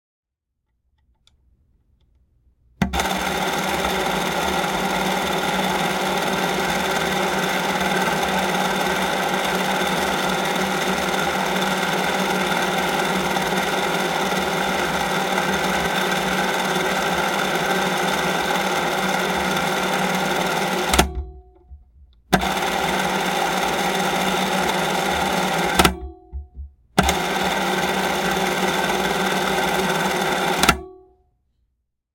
Super 8 camera LONG filming
This is a Bell & Howell super 8 camera firing with the camera open so the motor is exposed.
film, film-camera, cinema